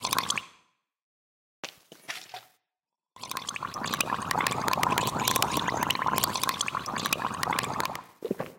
Gargling water
Recording of me gargling some water and then swallowing them